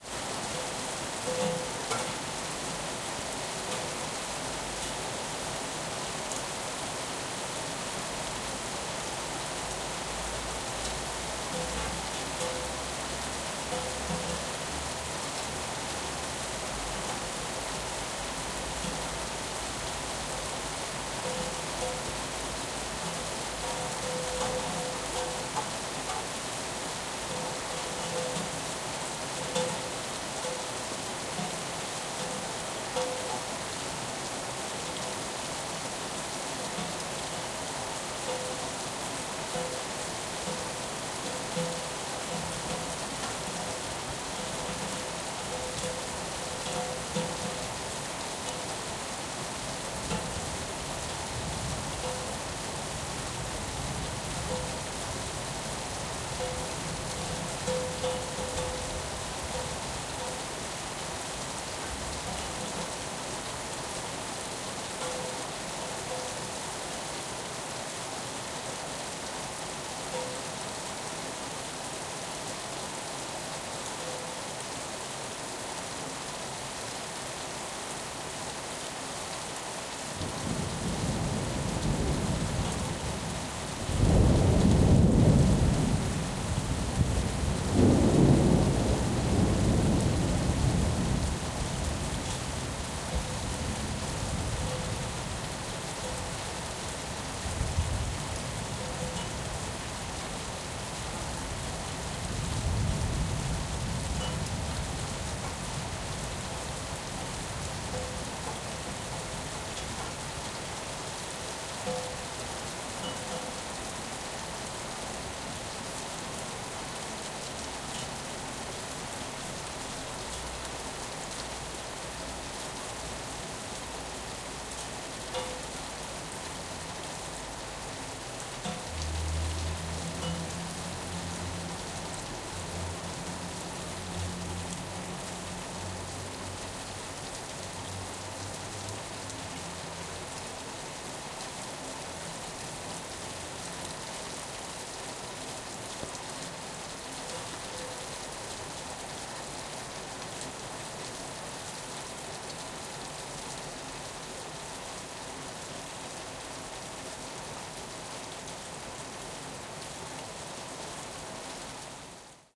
Rain dropping on metal surface
Rain outside a house in a city. Water drops on a metal surface, a drain or rod. You hear the thunder one time in the middle of the file.
house, dropping, drin, garden, field-recording, free, night, drops, thunder, ambience, lightning, atmosphere, cityscape, rain, water, city, backyard, ambiance, storm, rainy, ambient, nature